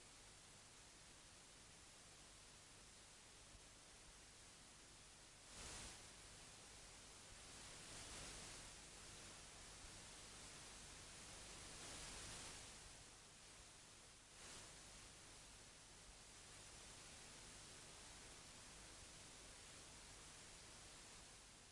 whoosh from a magnetic tape
whoosh, rustle, tape, magnetic, swoosh